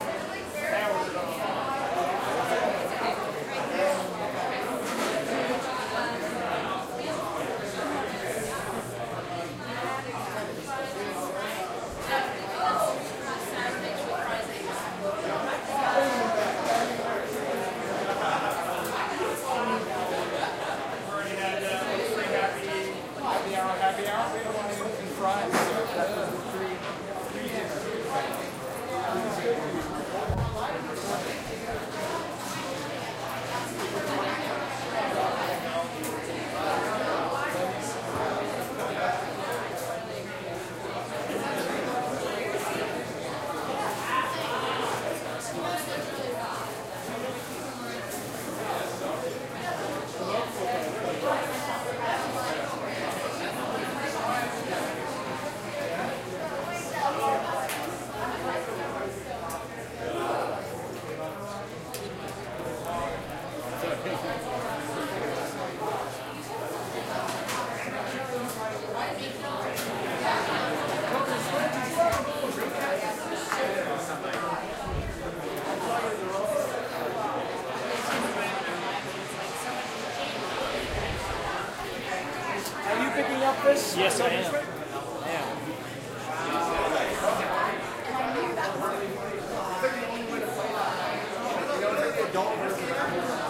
Hip Mediterranean Restaurant Ambience
The sound of a busy Los Angeles bar/restaurant that sells mediterranean type food.
ambience, bar, chatter, crowd, field-recording, los-angeles, people, restaurant, talking, voices